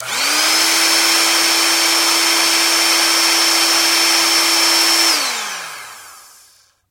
Electronics-Electric Air Pump-02
This sound was taken from an electric air pump that is used to fill an air mattress up. This particular sound was mic more towards the back of the device.